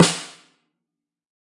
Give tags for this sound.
multisample
1-shot
snare
velocity
drum

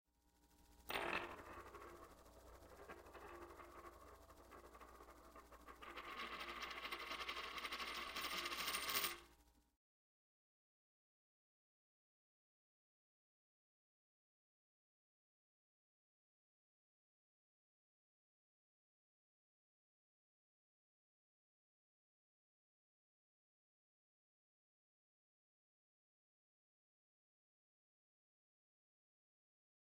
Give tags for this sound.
processed
money
coin
spinning
spin

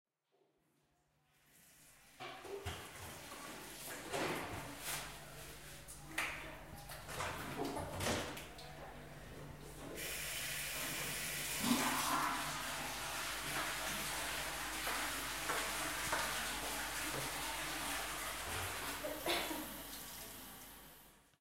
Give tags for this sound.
bathroom
enviromental
flush
flushing
toilet

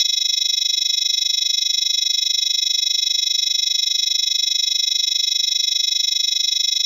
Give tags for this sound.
beep
film
futuristic
long
osd
scifi
simple
text

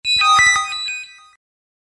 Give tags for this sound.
effect
gameaudio
sound-design
soundeffects

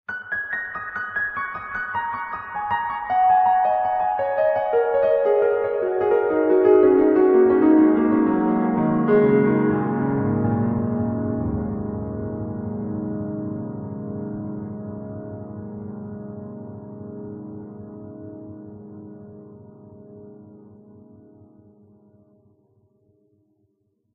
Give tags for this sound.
notes
downwards
piano
tape
pentatonic

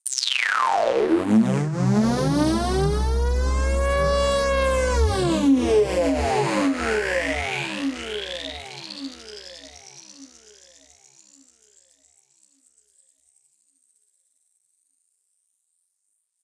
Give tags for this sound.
acid
electronic
fx
sfx
synth
sweep

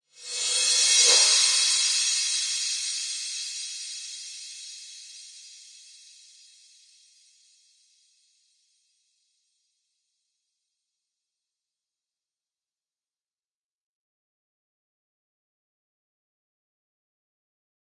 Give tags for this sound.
cymbals
fx
reverse
echo

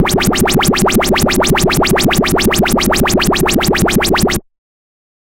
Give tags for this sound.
effect
wub
dubstep
dub
club
porn-core
techno
sub
wah
beat
synth
electro
bass
loop
rave

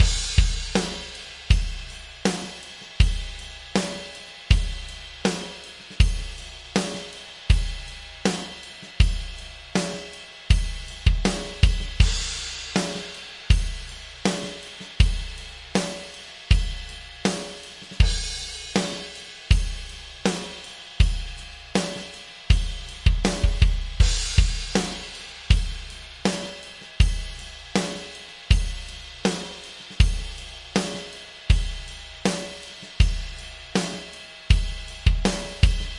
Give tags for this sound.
80
beat
blues
bpm
Chord
Do
Drums
HearHear
loop
rythm

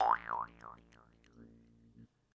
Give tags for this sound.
boing
bounce
doing
funny
harp
jaw
silly
twang

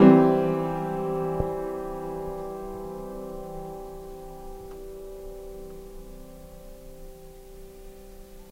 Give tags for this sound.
atmosphere
cathedral
ambience
prague

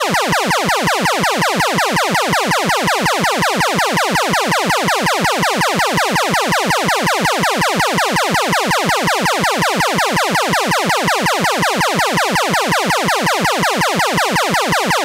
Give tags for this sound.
cartoon
siren
Synthetic

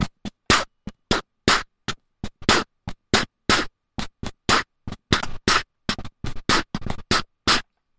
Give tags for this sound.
percussion
rhythm
loop
lofi
120-BPM
beatboxing
120BPM

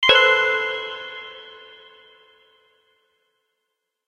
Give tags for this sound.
clicks
sound
desktop
intros
bleep
bootup
event
application
blip
sfx
click
effect
game
startup
intro

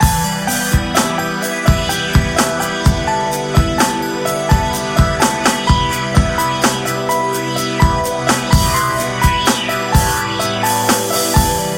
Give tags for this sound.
game
gamedev
gamedeveloping
games
gaming
indiedev
indiegamedev
loop
music
music-loop
Philosophical
Puzzle
sfx
Thoughtful
video-game
videogame
videogames

